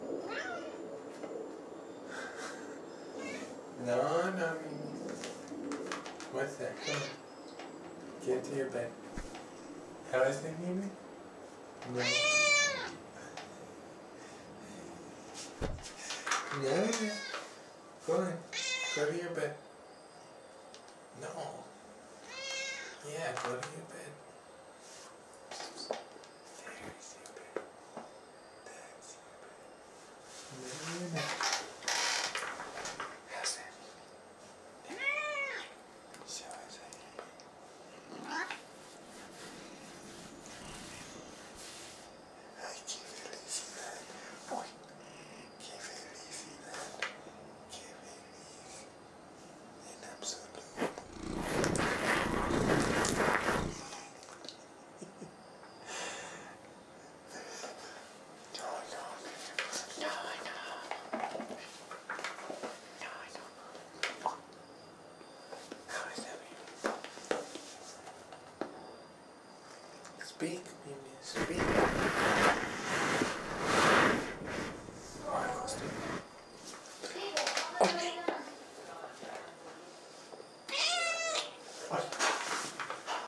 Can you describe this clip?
meowmeow miumiu
my cat mu mu, meowing and purring rubbing on the mikes etc
ambience, cat, girl, kitty, meow, purr